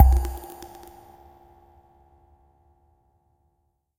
this sound remember me old japanese music :)
organic, strange, water, wood, fx